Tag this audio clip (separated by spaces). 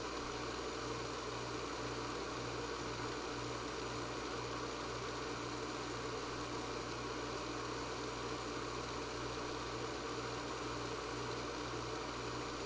machine drive motor